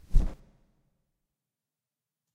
Fluttering sound for use in game development.